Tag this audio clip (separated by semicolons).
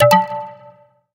sweet; cute; alert; sound; alarm; caution; warning